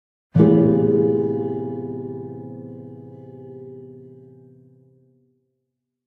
horror-effects hit suspense metal impact metallic percussion